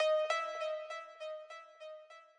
Pluck 2 note (4th+5th)
These sounds are samples taken from our 'Music Based on Final Fantasy' album which will be released on 25th April 2017.
Lead, Music-Based-on-Final-Fantasy, Pluck, Sample, Synth